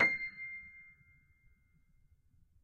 One-shot from Versilian Studios Chamber Orchestra 2: Community Edition sampling project.
Instrument family: Keys
Instrument: Upright Nr1
Note: C7
Midi note: 96
Midi velocity (center): 30555
Room type: Practice Room
Microphone: 2x Rode NT1-A spaced pair